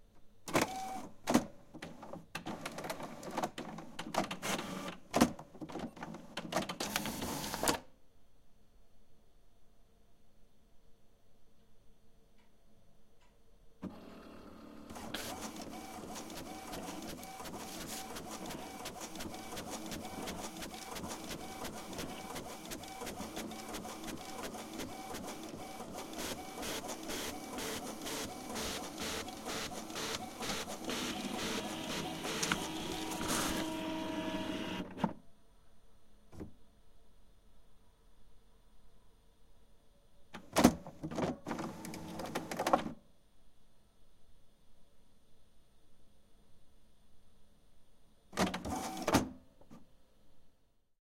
printer hp4334
HP 4334 printer prints
document, printer, print